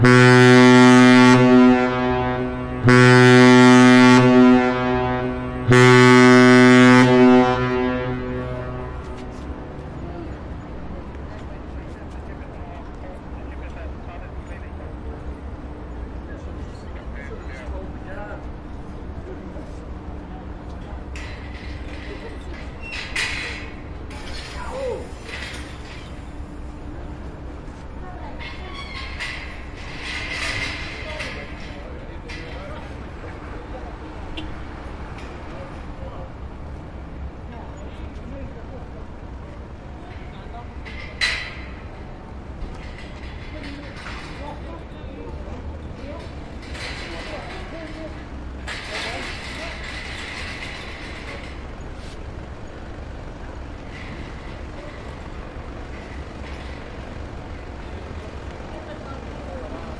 Le Soleal Horns, New Zealand
Recorded by a XY stereo mic capsule and ZoomH5 recorder.
Recorded on January 22nd, 2015 at Auckland Harbour, New Zealand.
Recorded at 18.10PM